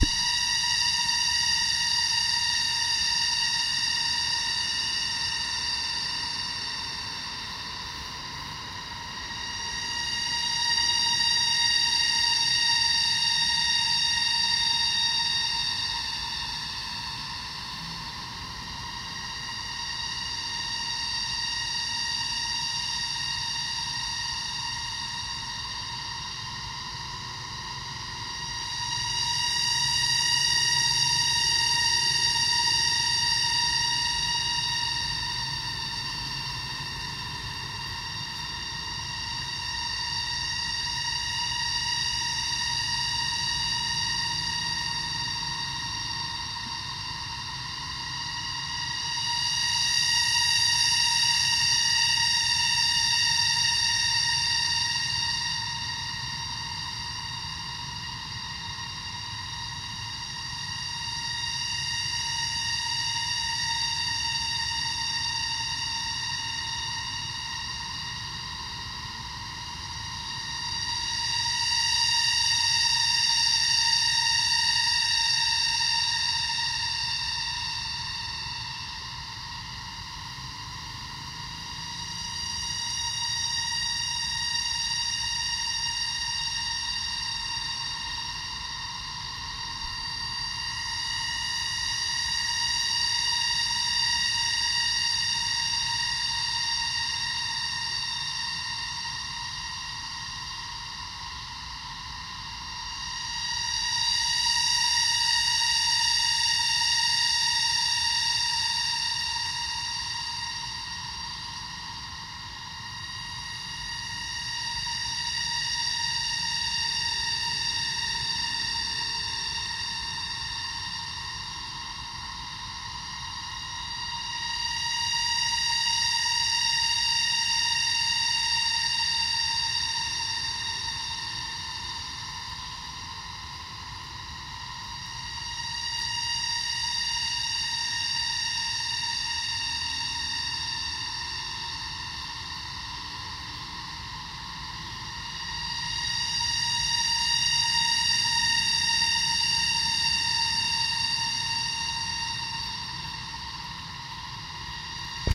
Angkor Wat Jungle cicada 3
Using a Zoom H1 near Angkor Wat I recorded some haunting cicadas in the evening